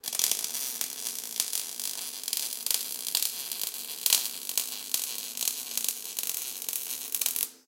Sound of welding works. Please write in the comments where you used this sound. Thanks!